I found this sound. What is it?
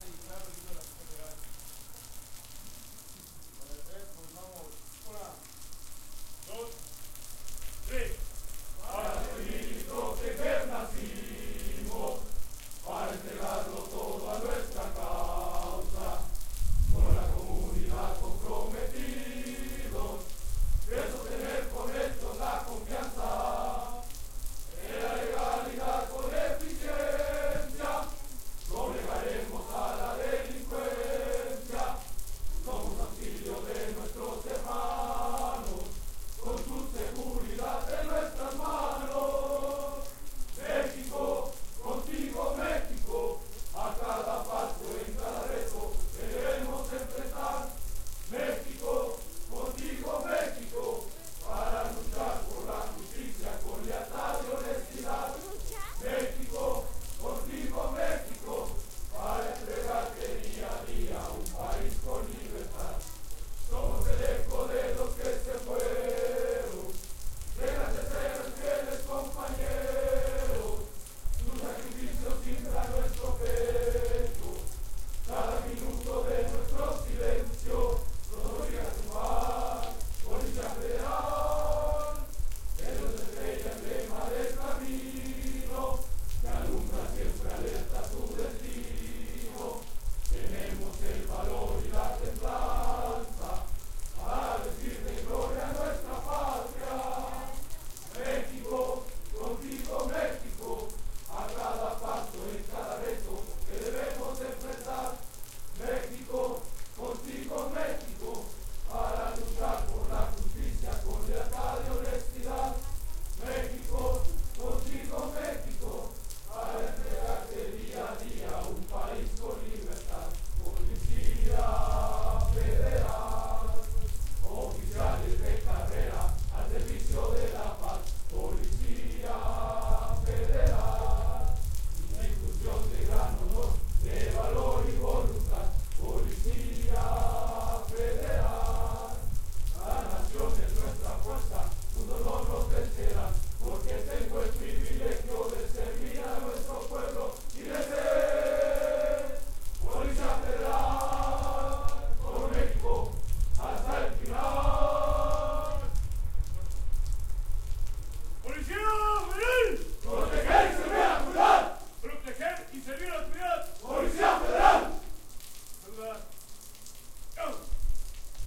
Mexican federal police hymn on rain
hymn, mexico